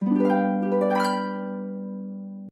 a dreamy harp flourish. perfect for transitions or dream sequences.